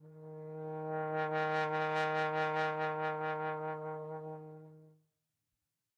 One-shot from Versilian Studios Chamber Orchestra 2: Community Edition sampling project.
Instrument family: Brass
Instrument: Tenor Trombone
Articulation: vibrato sustain
Note: D#3
Midi note: 51
Midi velocity (center): 63
Room type: Large Auditorium
Microphone: 2x Rode NT1-A spaced pair, mixed close mics
brass, dsharp3, midi-note-51, midi-velocity-63, multisample, single-note, tenor-trombone, vibrato-sustain, vsco-2